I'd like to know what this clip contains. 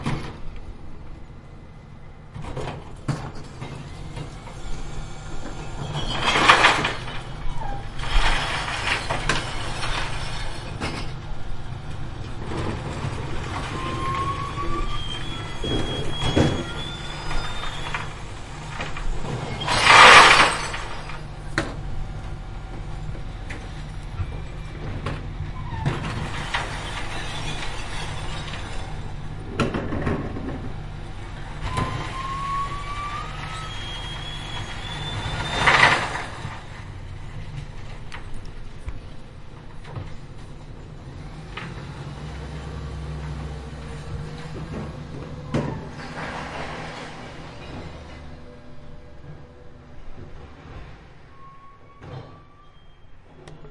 Son d'un camion poubelle lors du ramassage des ordures ménagères en Angleterre. Son enregistré avec un ZOOM H4NSP.
Sound of a dustbin lorry during the rubbish collection in England. Sound recorded with a ZOOM H4NSP.
dustbin, england, lorry, truck